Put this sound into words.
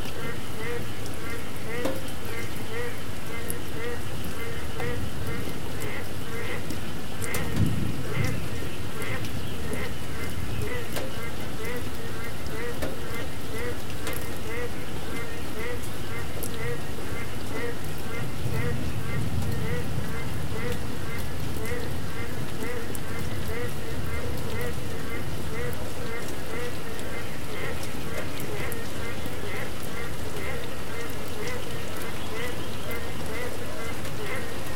Frogs outside my compound, croaking in the artificial mote surrounding the community square.